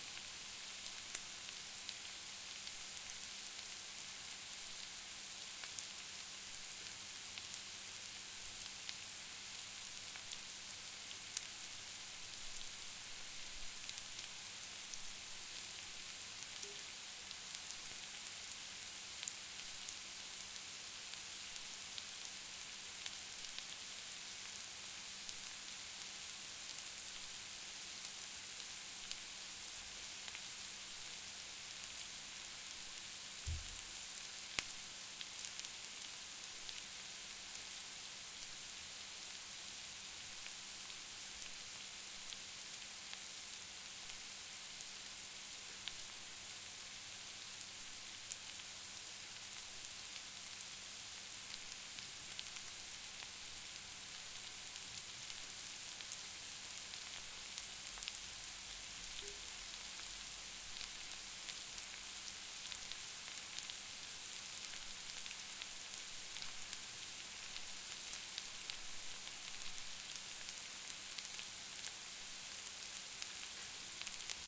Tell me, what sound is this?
Fry some onions in a pan.